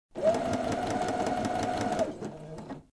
Brother Computer sewing machine. Recorded with AKG P220